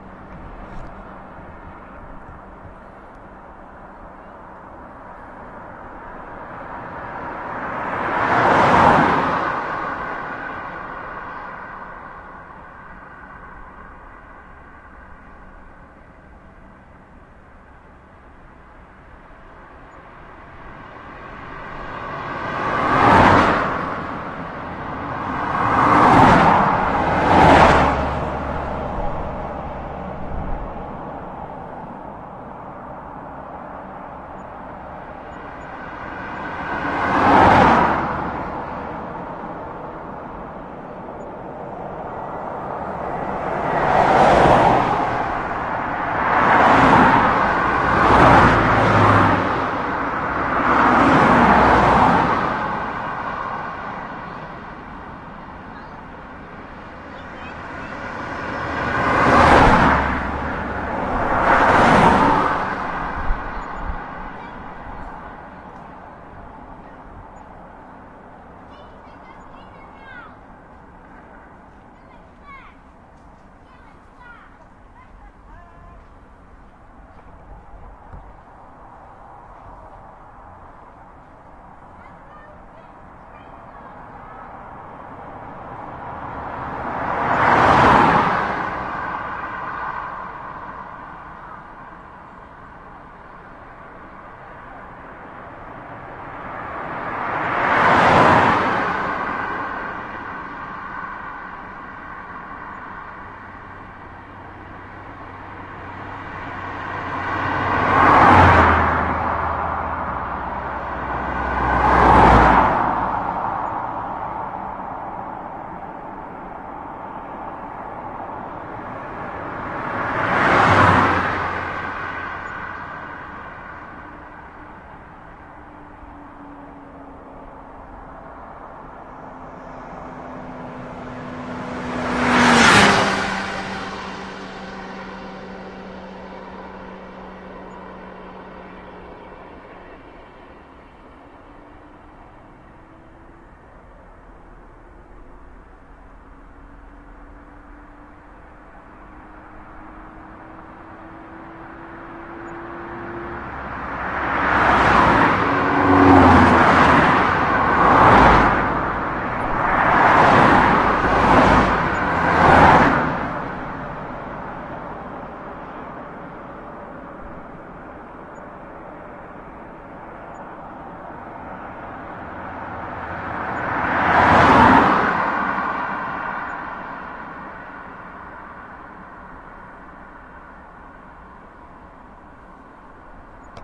busy road car passing
A busy B road cars passing and one motor bike.
Doppler, field-recording, road